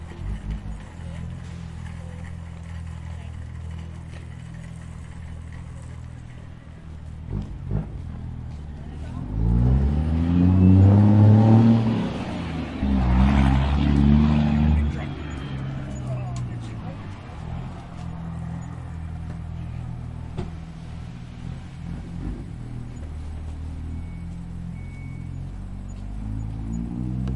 The ambient noise of a parking lot at a Walmart store, dominated by the throaty sound of a truck's engine. Carts rolling, cars starting up, etc. Recorded with the Zoom H4N.